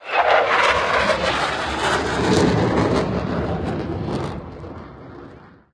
Fighter Jet 2
Celebrations took place in İzmit yesterday (on 25 June) on the 101st anniversary of its liberation during our war of independence against occupying forces. I recorded this fighter jet during its flight with TW Recorder on my iPhone SE 2nd Generation and then extracted some sections where not much except the plane itself was heard.
aeroplane, airplane, F16, fighter, fighter-jet, fly, flying, jet, plane